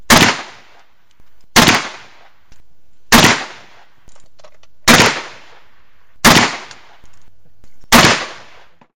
Six rounds from a .357 Magnum revolver. Have tried to remove background noise as much as possible.
357-mag, mag, magnum, pistol, shots